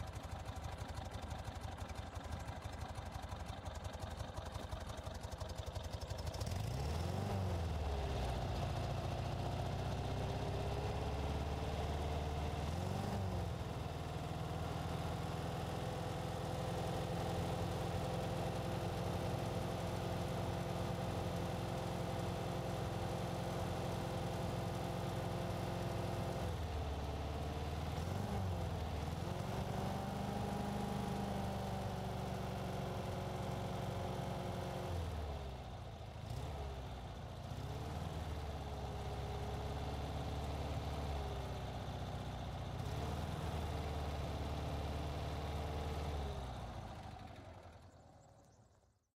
Volkswagen Type 2 revving up2
Volkswagen Type 2 revving up (some bird sounds) and turns off
start, van